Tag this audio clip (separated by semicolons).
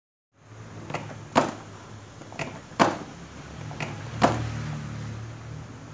simple; solo; Casual